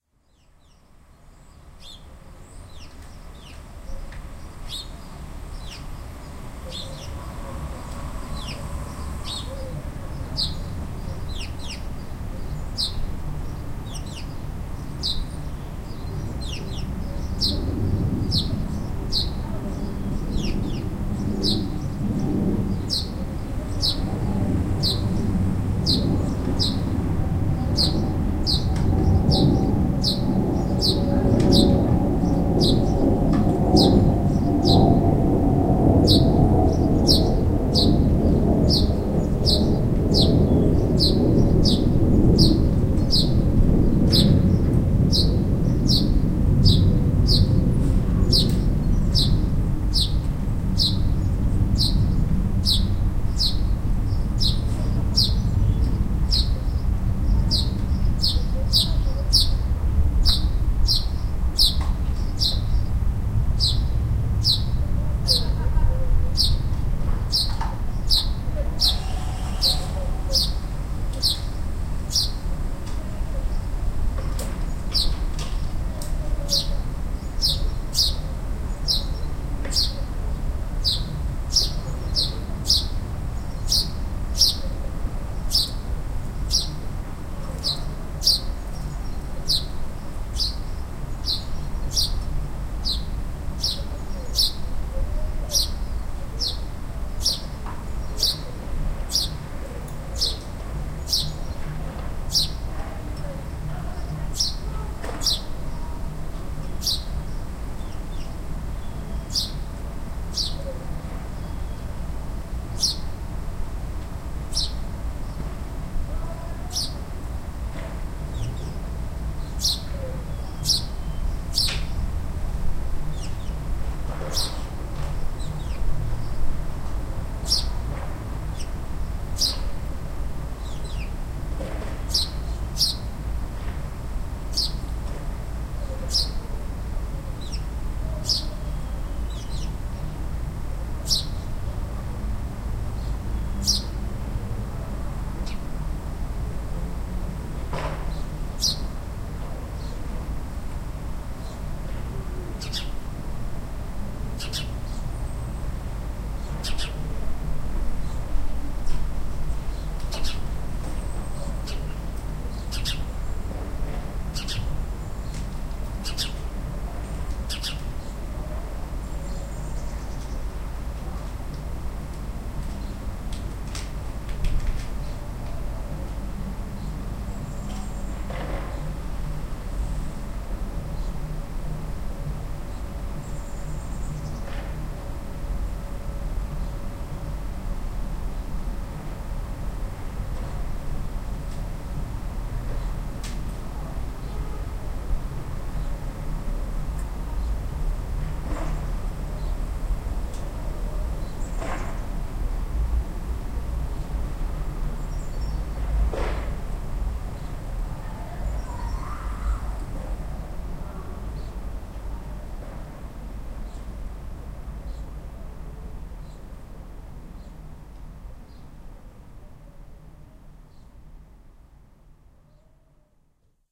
Recorded from my kitchen window in the second week of March 2010 on a day that felt like Spring. Sparrows were out singing in nearby trees and jets overhead were heading into T.F. Green airport.
birds, rhode-island, sparrows, window